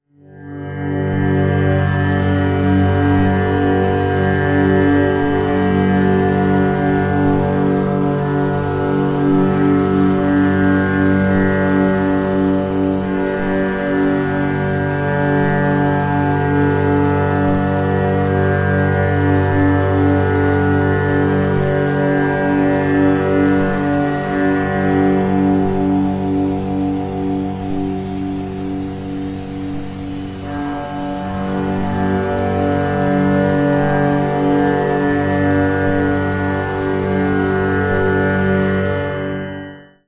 aeolian guitar played with hair drier